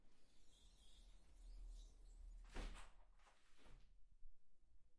closing window climalit
closing a glazing type climalit, binaural recording
closing, glide, window, windows